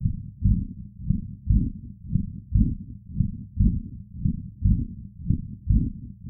Batida Cardiaca Heart Beat
Obtain with filters, lo fi, distortions and other things that i can't remember.
batida-cardiaca, coracao, heart, heart-beat